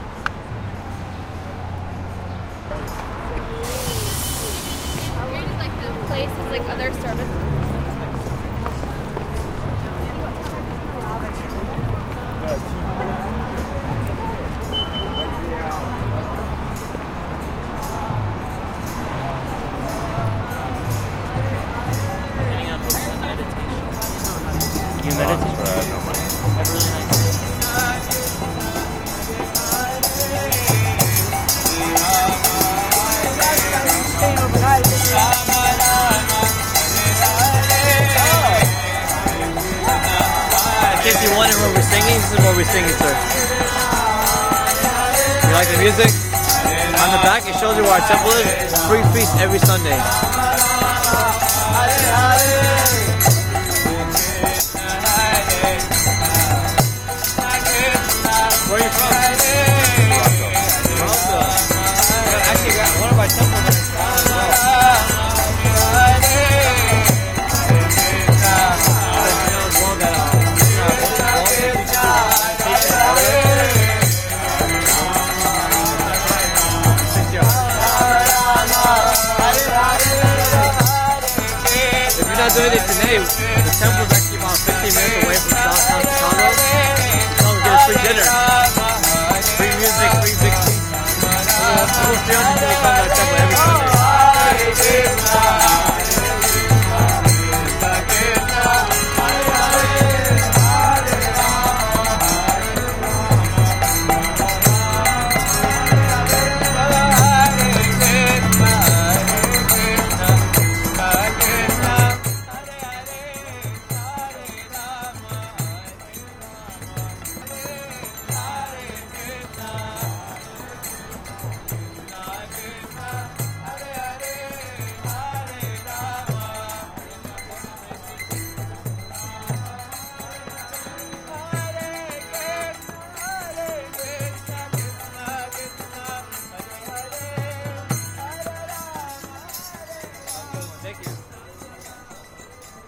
Hare Krishna Street Musicians
recorded on a Sony PCM D50
xy pattern
Hare, Street